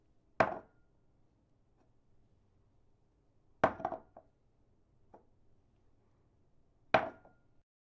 setting down glass cup
cup, down, glass, setting